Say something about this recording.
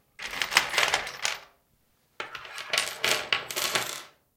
different noises produced with the screws, nails, buts, etc in a (plastic) toolbox